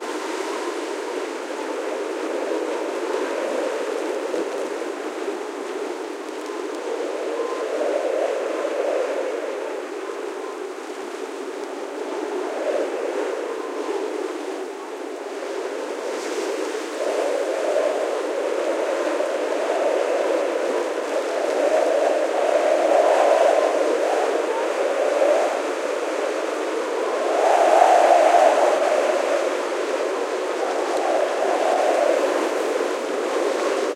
210523 1598 FR Blizzard
Blizzard !
This audio comes from a recording I made during a very windy day at Cap de la Chèvre (Brittany, France), to which I applied a hard low-cut filter to make it sound similarly as blizzard would.
Hope you like it !
howling, gust, strong, Alaska, blowing, soundscape, France, atmosphere, north-pole, Antarctic, south-pole, high-mountain, typhoon, rain, sand, storm, ambience, arctic, hurricane, Siberia, blizzard, field-recording, wind, howl, windy, snow, cyclone, ice, Brittany, Iceland